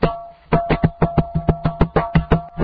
3 gal. bucket with a sony voice recorder placed on the inside. edited with sony sound forge, Eq to get rid of the crappy voice recorder sounds and added time compress 85% of original, bend pitch and reverse on end. Also added stereo pan